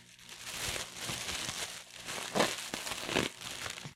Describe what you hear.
plastic ruffling2
noise, ruffle, plastic